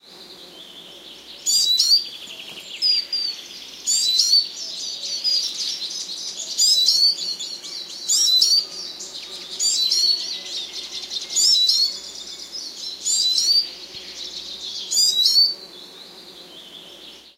a short sound sample of forest at the crack of dawn 6 a.m.
Location: an island in Finnish archipelago (municipality of Kustavi)
forest, bees, swallow, cuckoo, seagull, birds